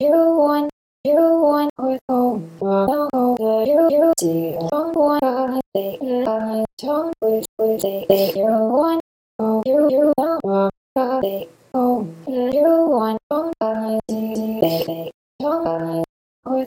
Vocal Chops, Female Dry
The same chops that I posted forever ago, except without the reverb. I left the autotune because it's integral to the sound I'm going for (and the original was sung in a totally different key, haha.) doesn't contain harmony this time.
chops, pitched, voice, dry, vox, woman, sample, autotune, vocal, chop, melody, English, 115-bpm, female